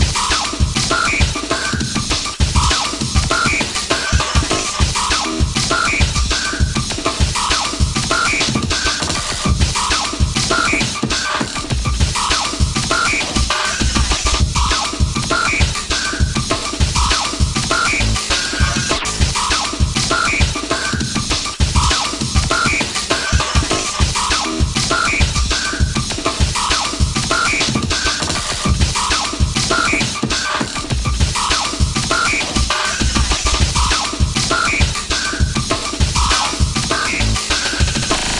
Noise and sliced drum loop (200 bpm)